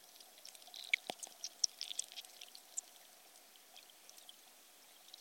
Mono extract from an underwater recording from the West coast of Scotland, on the mainland near the Isle of Skye. Some sounds of creatures rustling around near the mic and other unidentified activity. I don't know what animals make these noises, but I'd certainly like to... The loud click near the beginning is almost certainly some kind of snapping shrimp.
Hydrophone resting on the bottom of some shallow water.
Only processing is some amplification and conversion to mono 16bit. Recorded with JrF hydrophones and Sony PCM-M10.
hydrophone, unprocessed, underwater, chirp